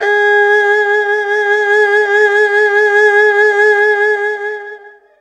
The Erhu is a chinese string instrument with two strings. Used software: audacity